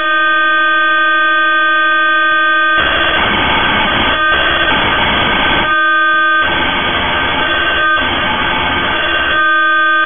Another sound I obtained from international radio transmissions and modified using one of my favorite audio editing programs.
It's a pretty simple noisy sound effect that is suitable for sampling and looping should you need to extend the sound or create layers with it.
It's quite science fiction sounding. But it's also suitable for any purpose really.
If you use my sound for any composition or application of any kind, please give me a credit for the sound sample.
Comments are always welcome!
am, cacophony, communications, frequency-sweep, military, white-noise, space, static, lo-fi, interference, short-wave, voice, shortwave, transmission, fm, modulation, signal, noise, radar, alien, radio